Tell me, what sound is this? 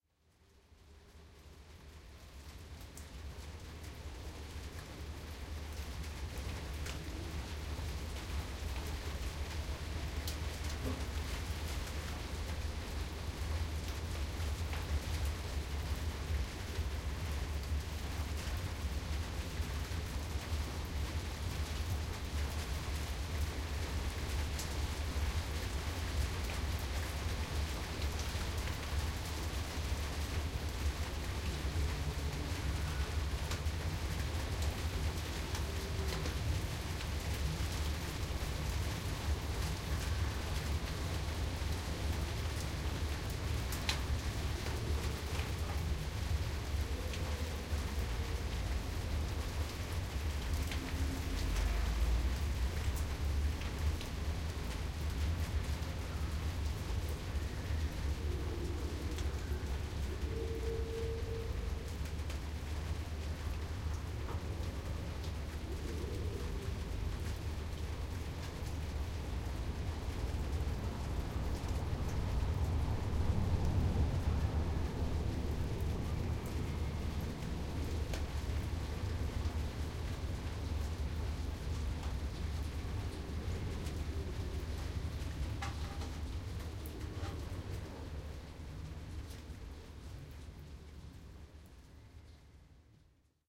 The sound of rain falling in a courtyard garden